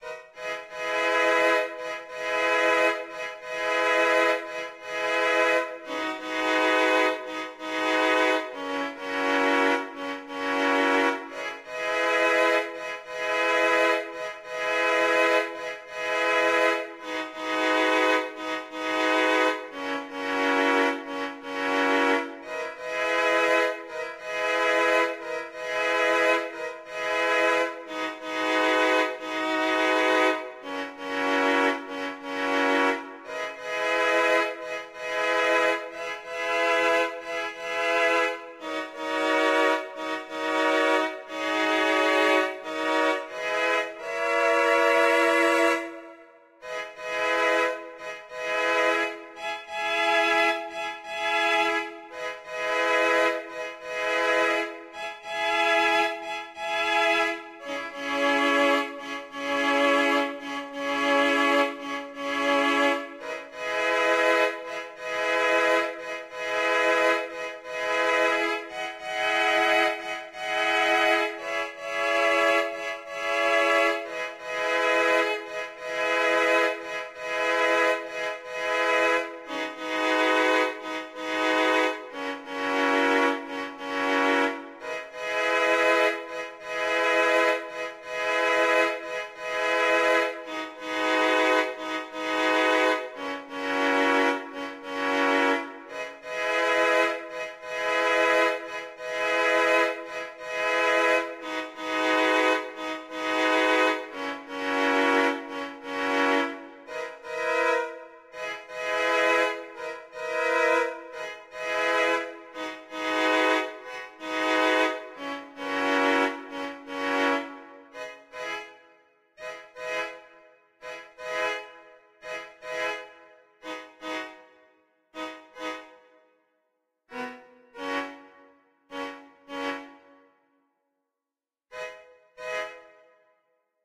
Cello Song
Soundtrack, Drama, Happy, Nostalgia